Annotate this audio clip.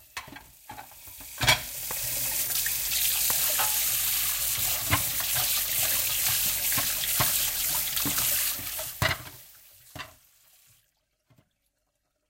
Washing up 3
Sounds of a tap running, with dishes cutlery being swished around in a metal sink filled with water.
Recorded in March 2012 using an RN09 field recorder.
Dishes, running, sink, tap, tap-running, Washing, Washing-up, Water